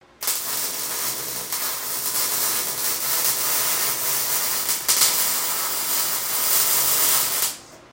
The use of the welding wand being used solo.